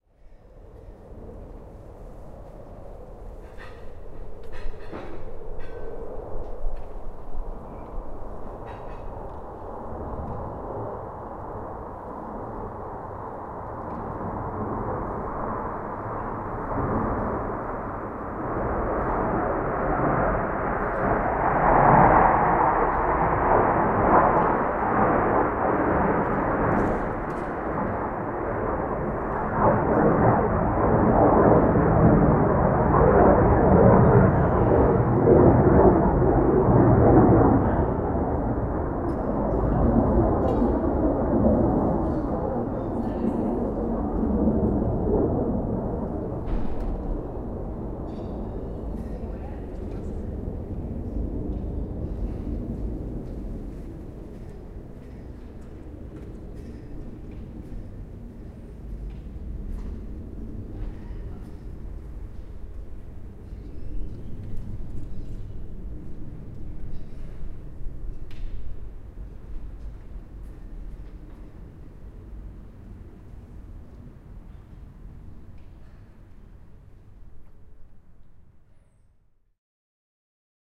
fighter on leon spain
A pair of fighter airplanes flying together over the city of Leon (Spain), recorded from my house with a Zoom H1, you can hear sounds of other neighbours into their kitchens, which is an strange contrast...
Dos "cazas" juntos de maniobras sobre León (España), grabados desde mi patio mientras los vecinos hacen la comida.
avion, caza, city, ciudad, Plane, war